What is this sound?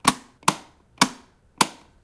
light-switch
simple plastic light switch